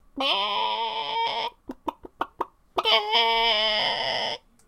short chicken cluck